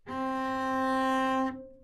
Double Bass - C4
Part of the Good-sounds dataset of monophonic instrumental sounds.
instrument::double bass
note::C
octave::4
midi note::60
good-sounds-id::8665